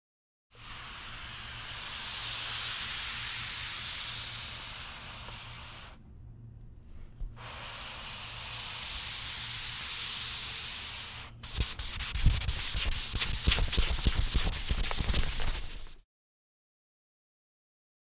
A dry eraser sliding across the chalk tray.